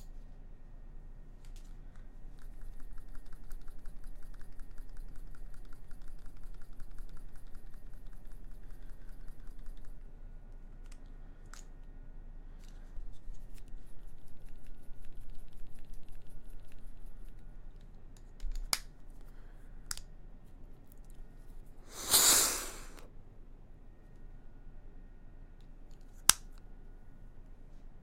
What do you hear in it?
Using My Inhaler
A short session of me shaking my inhaler, then using it. Apologies if it's a little quiet.